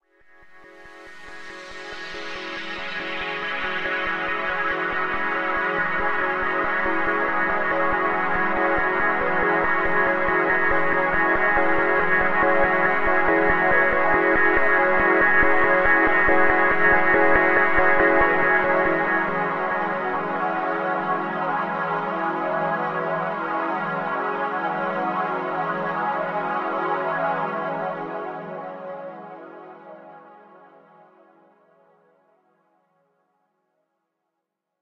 ae pulsePad 70bpm
70 bpm. Key unknown. Created in Reason.
70bpm, pad, pulse, space, spacey, synth